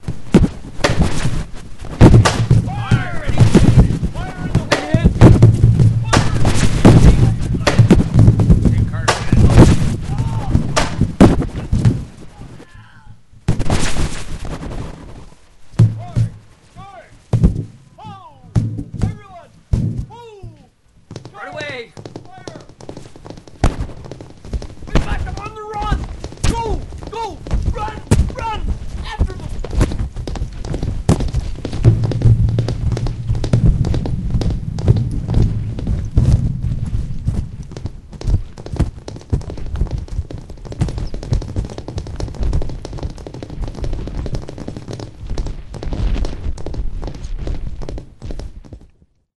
battle1-10sec
I created this short soundbyte with the assistance of three neighbours (voices) and assorted bits of kitchen equipment. My crude attempts at foley are probably pathetic to the more advanced, but I did my best.
The voices were recorded variously in several neighbours kitchens, (thanks to John, Chris, Jimmy)and the other sounds were recorded in various places around our house.
I was making battle sounds to go with a short contest video I was making for a special event celebrating the 1837 failed rebellion by Canadian Wm. Lyon McKenzie. It worked for my purposes. I only used bits of it to reinforce images. If it works for anyone here, that's awesome.
cannons, militia, guns, 1800s, galloping, shouting, horses, battle-sounds